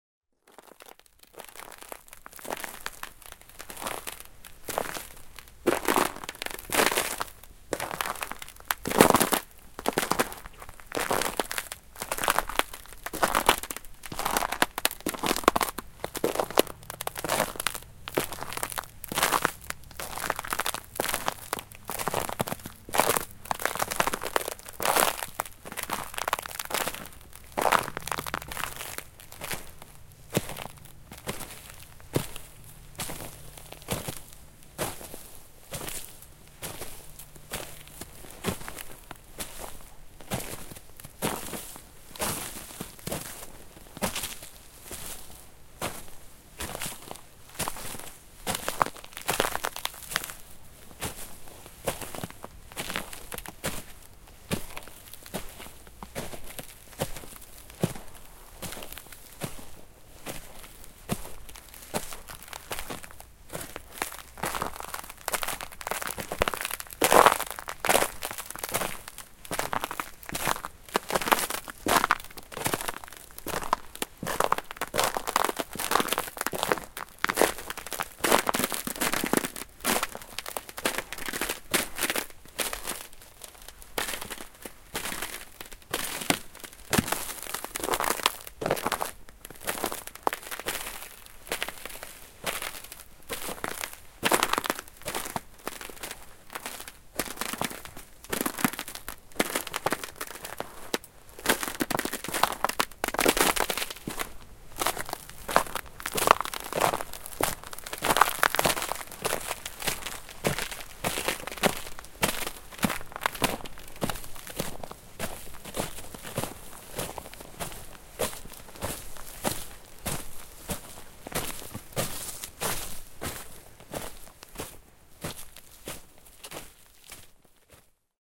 Walking on Crunchy Ice (01/30/2014)
A huge ice storm moves in to the back woods of North Carolina on 01/30/2014. First it snowed...and then it sleeted. When all that sleet froze on the surface of the snow, walking in this winter-wonderland produced an amazing sound. So, I put on my boots, grabbed my ZoomH4N and set out to record the magic!
This would be very useful as Foley FX for walking in any frozen environment. I walked on various surfaces and depths of ice and snow throughout. I tried to leave enough space between steps so that the sounds do not overlap so that they can be cut for editing purposes.